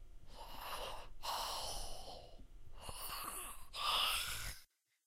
Goblin Heavy Breathing
A sleeping goblin.
Goblin, Sleeping